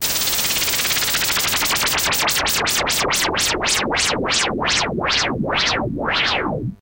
Made with a synthesizer by filtering some white noise and controlling it with an LFO, enjoy!
effects, filter, lfo, synth, transition, white-noise